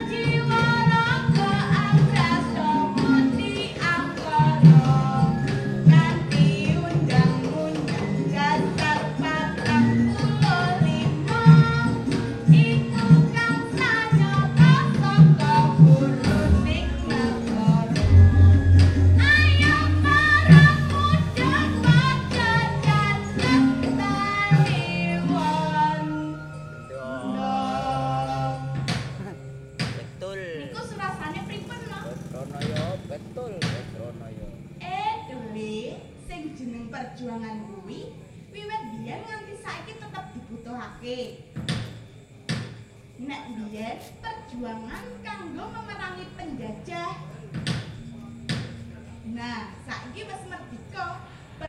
fairytale dalang
An unusual sound - a female dalang (puppeteer/director) performing part of the ramayana shaddow puppet epic near Tembi, Cental Java. Recorded using H4 Zoom internal mic.
ramayana, puppet, indonesia, wayang-kulit, dalang